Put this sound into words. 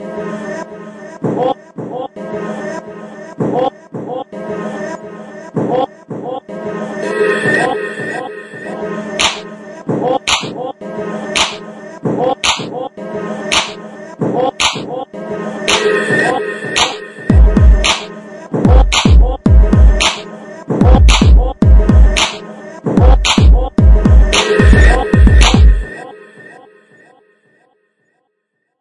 School's Out
Smooth,Bassy,Soft,Hype,Dreamy